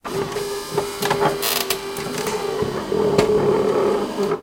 Coffee machine - Mechanism 1
Saeco Incanto Delux doing it's thing. Various noises it makes.
buzz, clank, coffee-machine, electro-mechanics, saeco